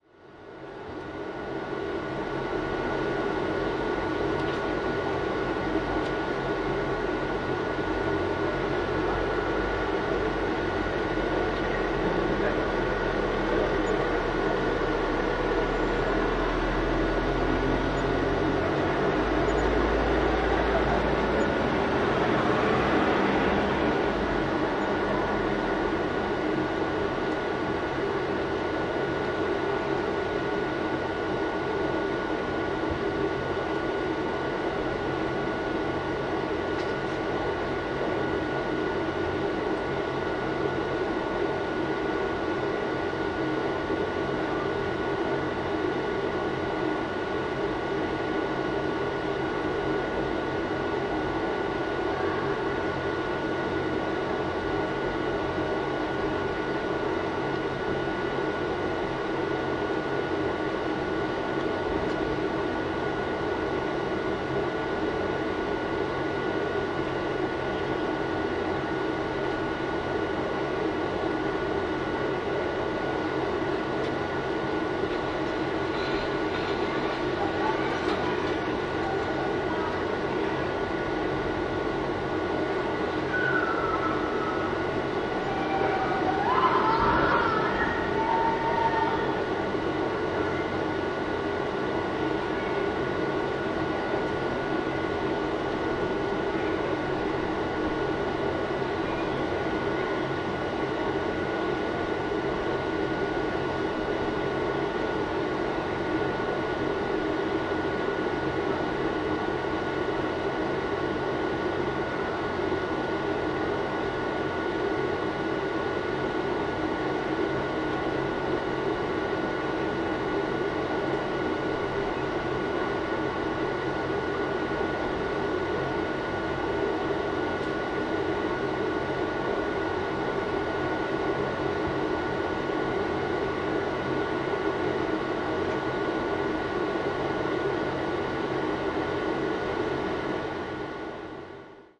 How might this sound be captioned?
fan church os.sobieskiego 06.04.2016
06.04.2016: soundwalk with my student (exercise during Ethnological Workshop: Anthropology of Sound). The Os. Sobieskiego in Poznań. The noise of fan. Sound recorded oudside the Sanctuary of the Divine Mercy. Recordist: Anna Weronika Czerwińska.
fan, fieldrecording, hum, noise, Os, Pozna, Sobieskiego, soundwalk